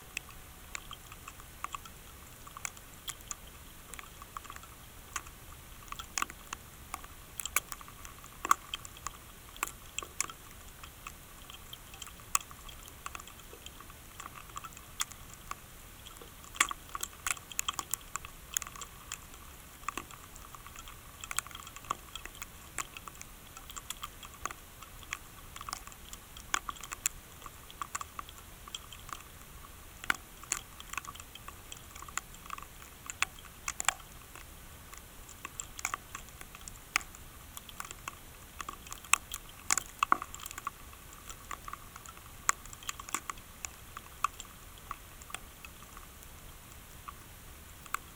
2015-04-09 Svínafellsjökull glacier top
Svínafellsjökull glacier in Iceland. JrF Contact mic was attached to an ice screw in the glacier surface. Cracking noises can be heard due to movement and melting of the glacier. Recorded with Zoom H2N. Processed using WaveLab with Sonnox de-noiser to reduce background noise.
contact-mic, field-recording, glacier, ice, iceland, nature, water